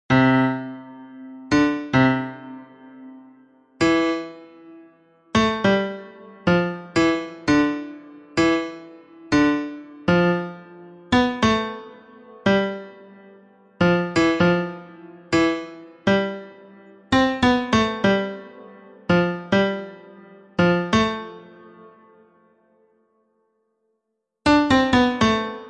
piano3 ex4 good bad
Piano dataset containing 127 audios from the 6 first exercises of Hanon's The Virtuoso Pianist, and the corresponding pitch and chroma labeling. It is used for the automatic assessment of piano exercises.